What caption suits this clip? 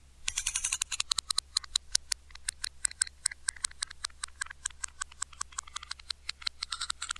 utility knife pitch:reverse
moving the dial of an utility knife (pitch/ reverse manipulated)
MTC500-M002-s14, knife, manipulation, pitch, utility